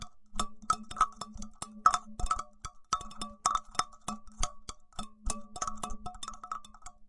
simple source sound. Microphones very close.